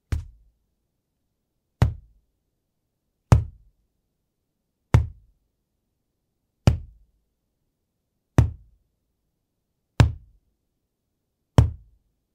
Deep thud punch
cardboard, deep, h5, punch, SGH-6, thud, tube, zoom